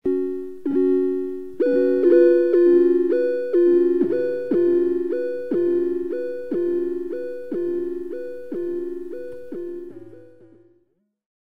Soft-synth Interlude
Slow, subtle, dreamy, electronic samply
effects, ambient, electronic